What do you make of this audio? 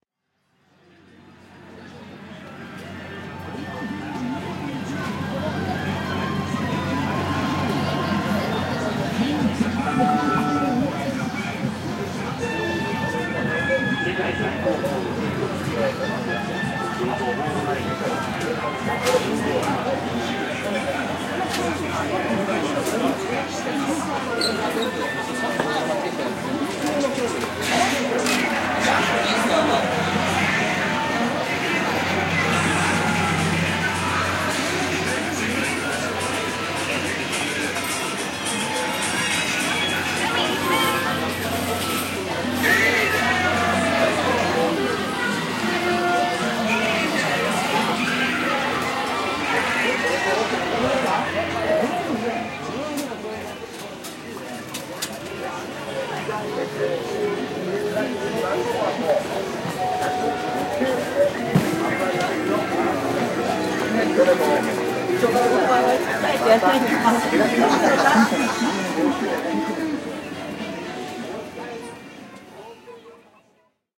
Tokyo - Mall 2
Generic Tokyo shopping mall atmos. Voices, background noise, various sounds coming from shops as I pass etc. Recorded in May 2008 using a Zoom H4. Unprocessed apart from a low frequency cut.
zoom, background, tokyo, ambience, shopping, mall, field-recording, japan, atmos, voice, h4